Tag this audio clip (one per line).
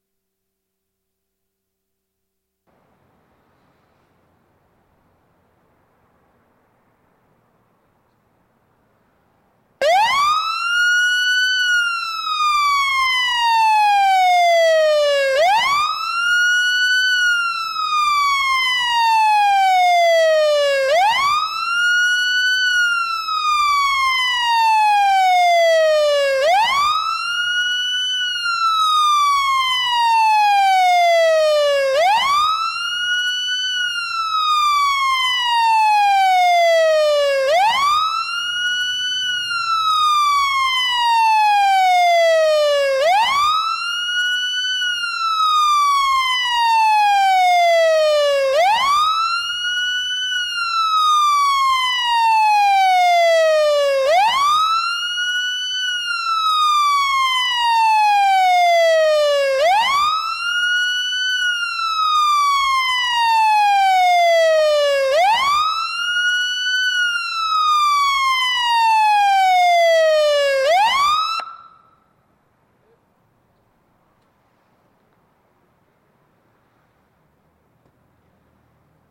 Fire-Engine
siren
fire-siren
Fire-truck